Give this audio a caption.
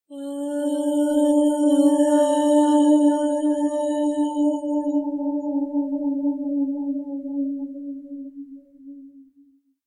Goddess Voice
I'm helping a friend build a soundscape for a Halloween event. She needed something for a dark goddess section. So here it is.
Recorded with a Yeti Snowball and effects mastered on Mixcraft.
Hope you like it!